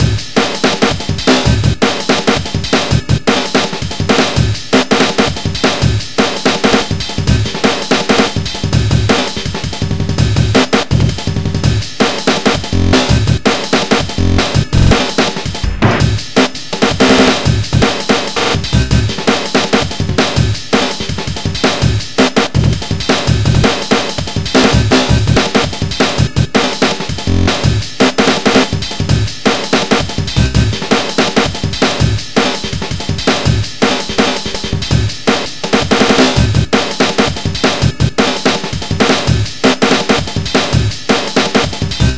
drumloop rework (slices) with vst slicex combination + vst dbglitch
vst edison - soundforge 7 (recording final sample)